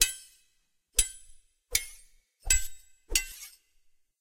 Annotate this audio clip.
Sword Fight
Several sounds of small blades hitting, with unfortunate cheap reverb applied. Recorded using a cheap condenser microphone through a Focusrite Saffire 24 DSP.
sword, slash, blade, fight, movie